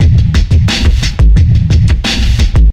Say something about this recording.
allconnected 88bpm
88 bpm oldskoolish hiphop beat, pretty hard & compressed, programmed by me around 2001.
88bpm, beat, breakbeat, fat, hard, hiphop, loop, old-skool, processed